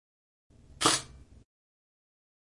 Hassselblad C500 shutter
Shutter sound of a Hasselblad C500 camera.
shutter, photo, photography, slr, hasselblad, camera